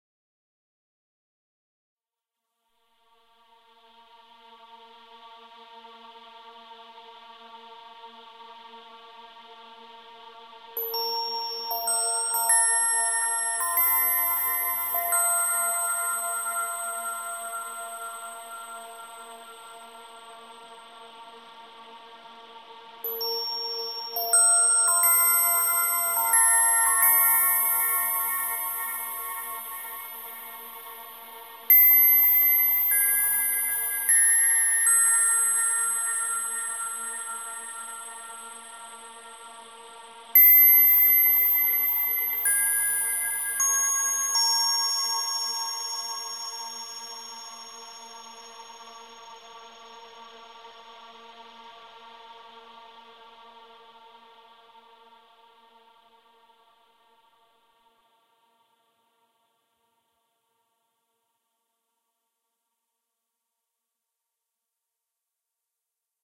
dead Verstorben
cinematic, synthesiser, planet, atmosphere, Melody, jupiter, technique, drone, sadness, reverb, venus, mars, voice, saturn, synth, space, sound-design, Background, mekur, processed, sci-fi, choir, ambience, Soundscapes, soundscape, thunder, UranusAtmospheres